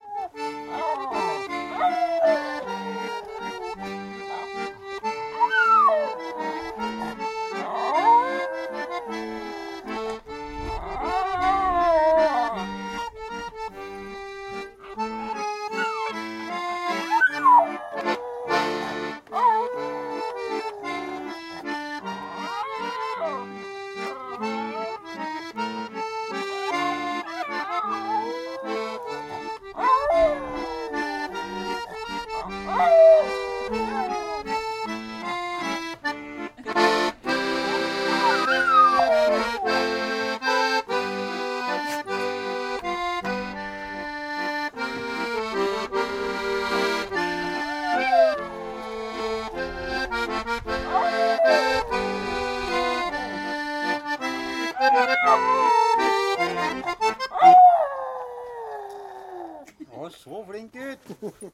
Dog singing, while accordion is being played. Voices in background. Norwegian. Tascam DR-100.